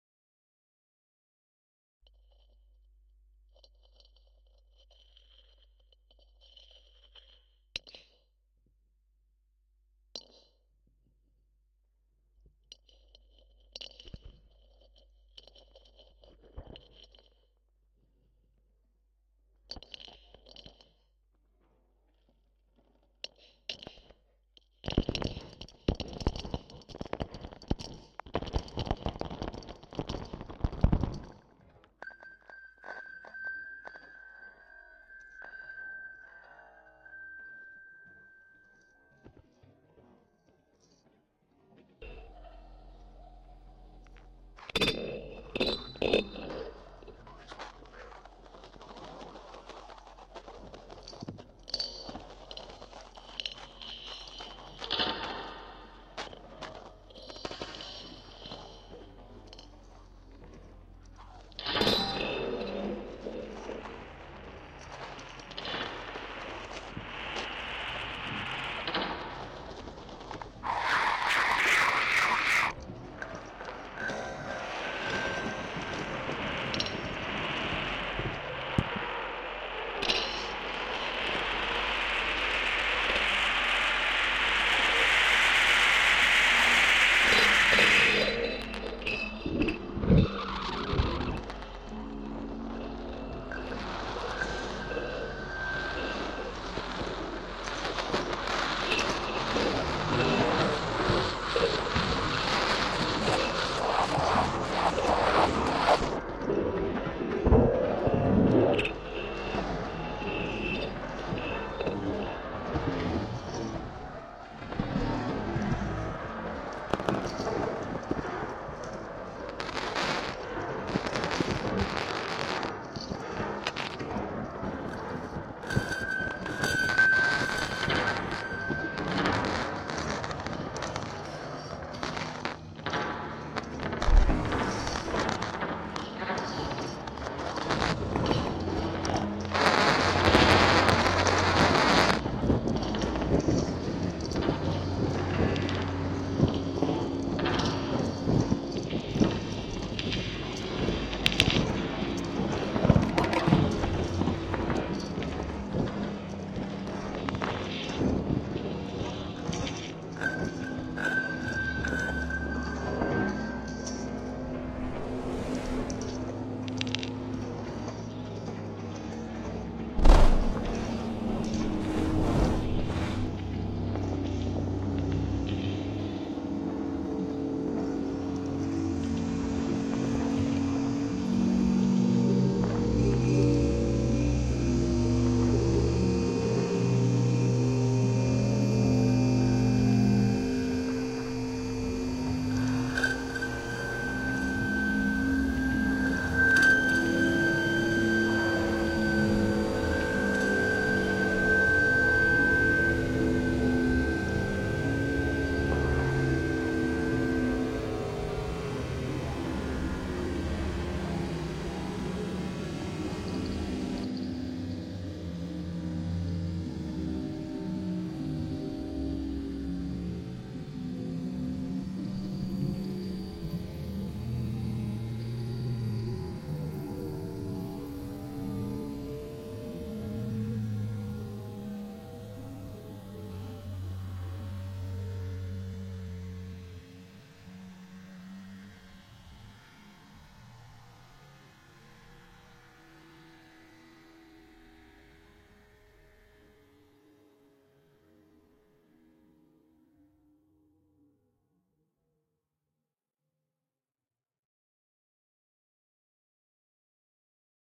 Soundtrack created purely from audio recorded with a contact mic and stones, layered and sometimes slowed down. For Immersive Stories, the Unheard Voice.